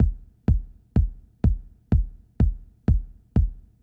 Kick house loop 125bpm-01
kick, loop, 125bpm